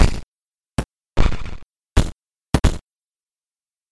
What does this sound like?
Piggy Bank 0bject count2
A fake little baby piggy bank shaking LOOP HITS!
effects, industrial, synthesized